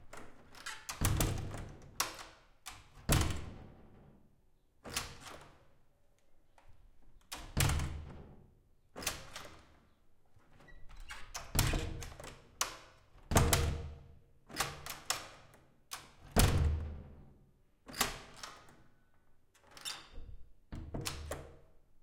door wood large solid with deadbolt open close turn handle spring lock roomy on offmic
handle, close, deadbolt, spring, open, large, door, lock, turn, solid, wood